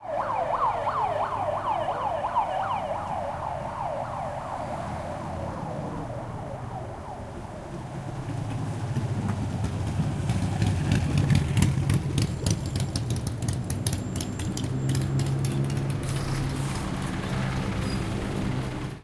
0169 Ambulance and motorbike
Ambulance and motorbike engine.
20120212